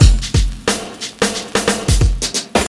funky beat raw dirty distorted drum